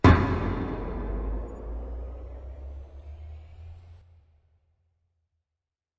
propane tank 02b
A single hit on a nearly empty 250 gallon propane tank, pitched and reverbed.